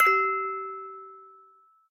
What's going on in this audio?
clean do chord
eliasheunincks musicbox-samplepack, i just cleaned it. sounds less organic now.